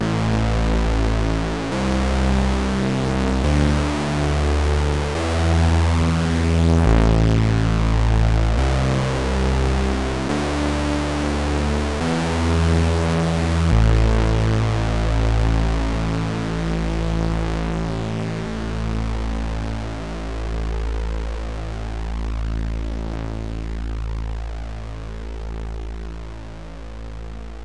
Dark Synth analog electronic distortion
dark, distortion, electronic, synth